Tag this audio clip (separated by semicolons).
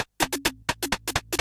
percussion; ms10; mono; synth; korg; ms-10